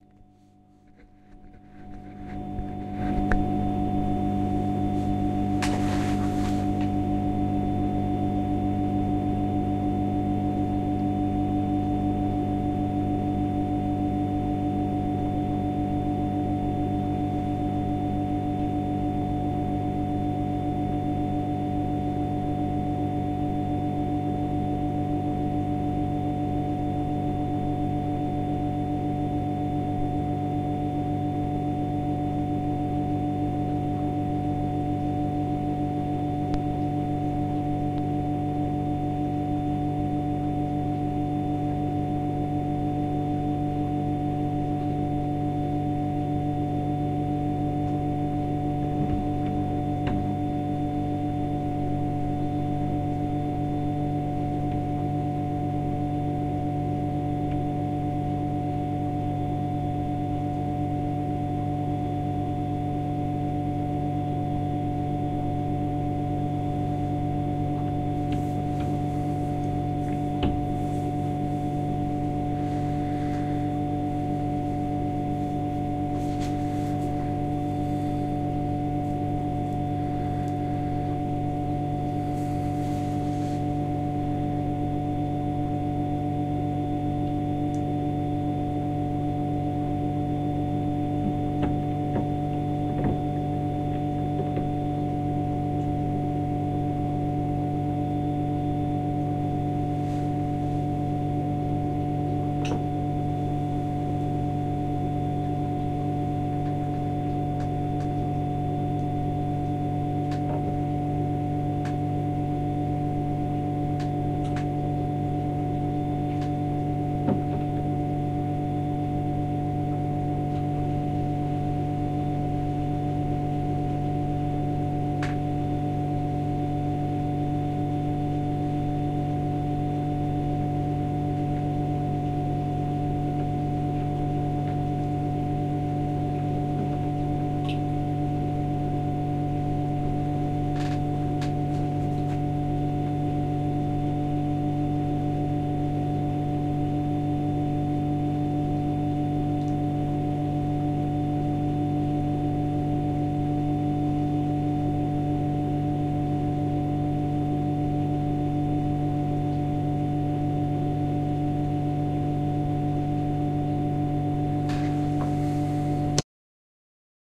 electric humm from shower
Electric hum from shower.
foley, home, machine